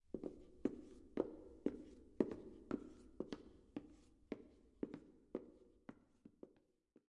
Footsteps on tile walking to distance
A series of footsteps that fade into the distance.
footsteps, foley, tile